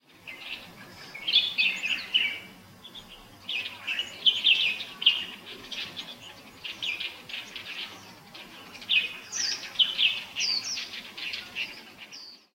Recorded with my cell phone, in my dormitory balcony. There's a lot of trees and numbers of birds behind the dormitory.